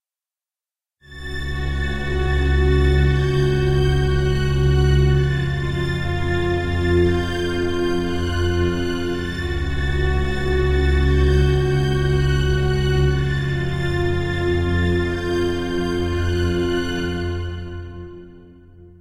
made with vst instruments
ambient, background, cinematic, dark, drama, dramatic, film, horror, mood, movie, sci-fi, soundscape, space, spooky, trailer